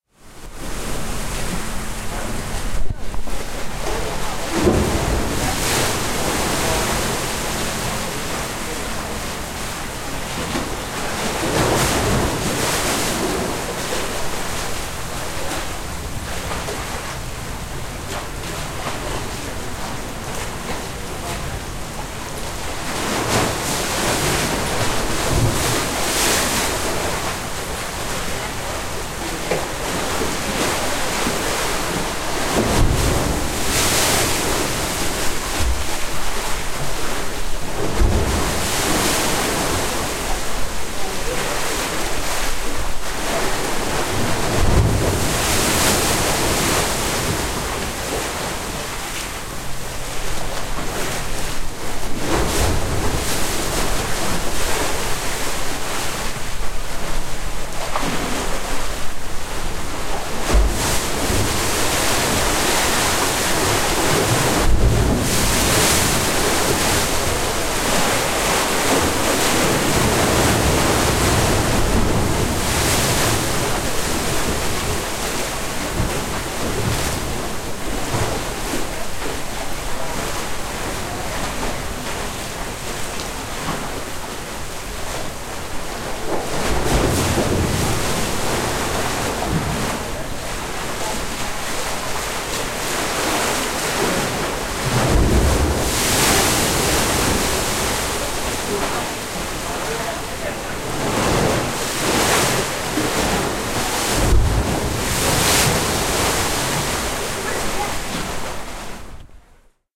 Thunder Hole is the place in Acadia National Park to experience the thunder of the sea against the rocky shores of Maine. Thunder Hole is a small inlet, naturally carved out of the rocks, where the waves roll into. At the end of this inlet, down low, is a small cavern where, when the rush of the wave arrives, air and water is forced out like a clap of distant thunder. Water may spout as high as 40 feet with a thunderous roar. Hence the name: Thunder Hole.
Mount-Desert-Island, Maine